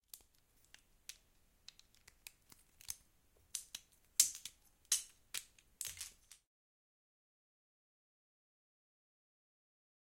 15GGalasovaK lego#2
This sound is when you build with lego
lego, cube, building